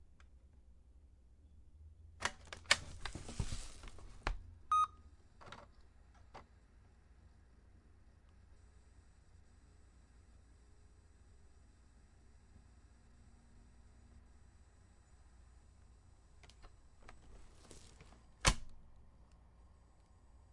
Open&closeLaptop(wakesup)T17
Opening laptop and waking it up after putting it to sleep.